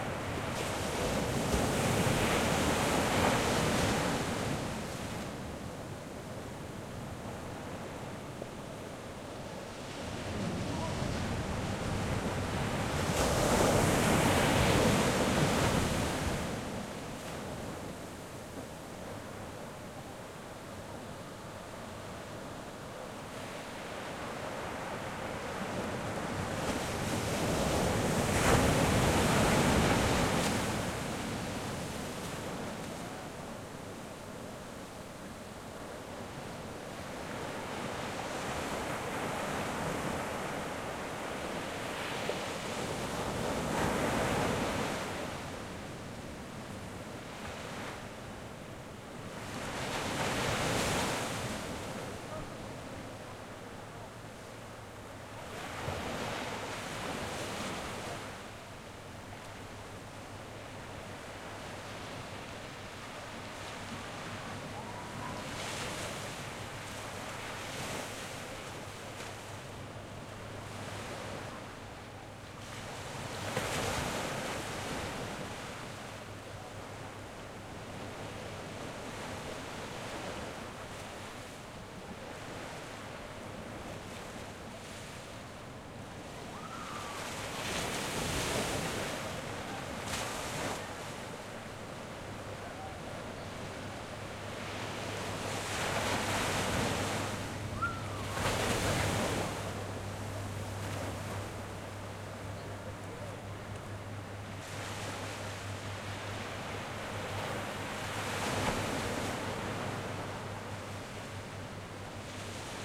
Beach - Waves & People
Recorded on Laguna Beach, CA in close proximity to the waves. There were other people on the beach so you'll hear them in the background as well.
Recorded outside with a Zoom H5 using the stereo microphones that come with it.
I would still appreciate it if I could see/hear the project this sound file was used in, but it is not required.
field-recording, water